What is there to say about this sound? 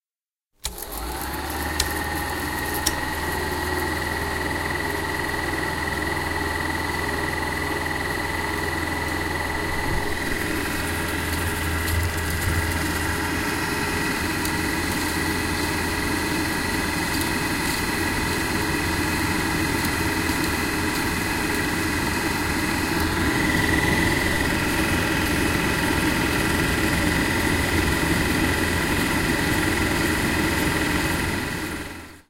Super 8 mm projector
Sound recording of a real super8 mm projector starting, without the reel running through it. Recording has different stereo scapes
8mm, cinema, clean, film, movie, project, projector, reel, rhythm, s8, silent-film, super8